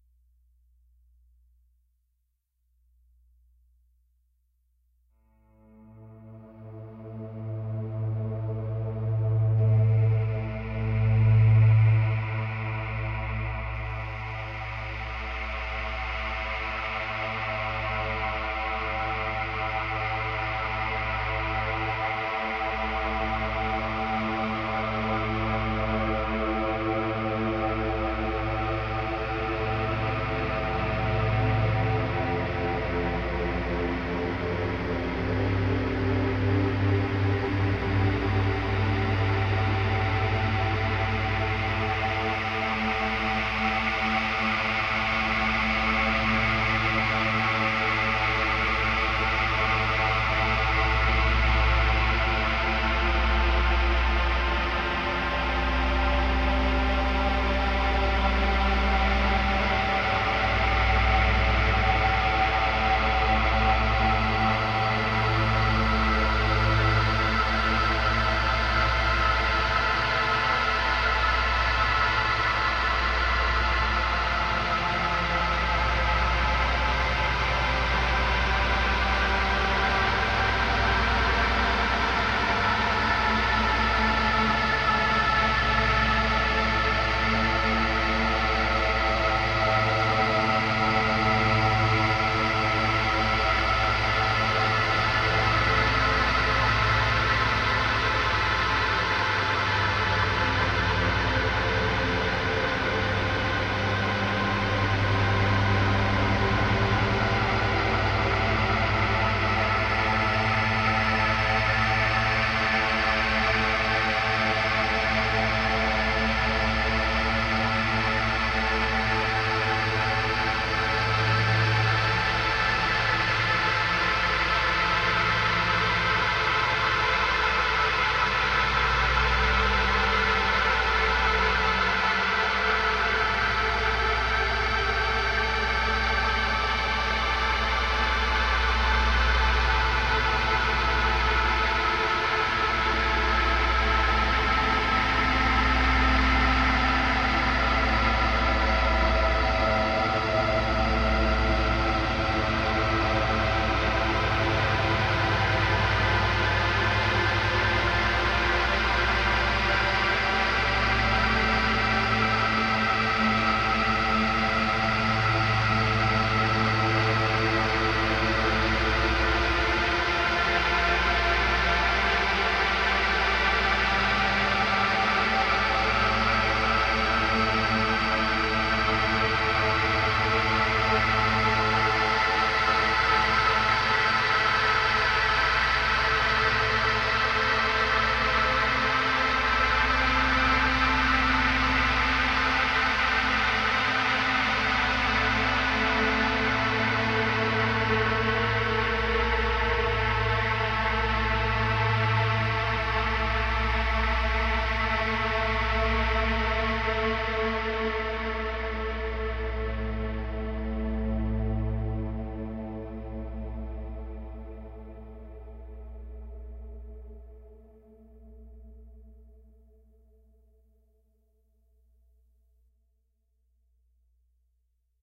Slow Death to Hell
Created with Line6 POD HD500 with ambient drone effects maxed out, Fender Telecaster Black Top, in Studio One 3
Inspired by Color Out of Space (Final Dissention Scene)
Thank you!
horror, tense